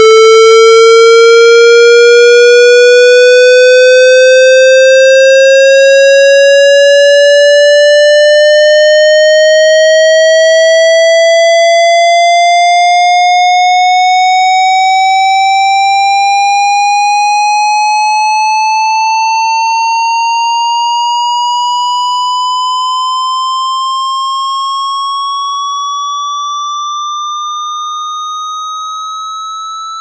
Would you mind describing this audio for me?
sq2chirp
chirp, squarewave